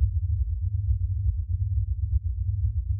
I wanted to create some synth tracks based on ancient geometry patterns. I found numerous images of ancient patterns and cropped into linear strips to try and digitally create the sound of the culture that created them. I set the range of the frequencies based on intervals of 432 hz which is apparently some mystical frequency or some other new age mumbo jumbo. The "Greek Key" patterns in my opinion where the best for this experiment so there are a bunch of them at all different frequencies and tempos.